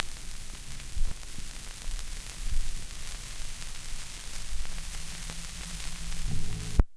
Bed noise
Vinyl record noise.